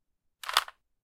Rubik Cube Turn - 12
Rubik cube being turned
plastic, rubik, board, cube, game, magic, rubix, click, puzzle